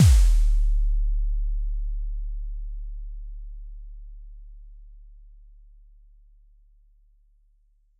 KD Daft Kick
That kick that was in that Tron song they did (Daft Punk - Derezzed)
Beam, Bit, Crusher, Daft, Derezzed, EDM, Lazer, Percussion, Punk